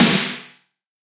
crap snare
loop beat drum